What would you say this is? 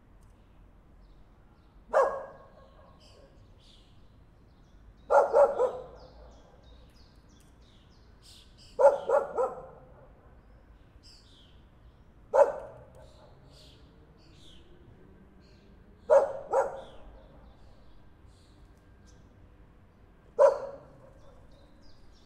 SE ANIMALS dog barking birds
animals, dog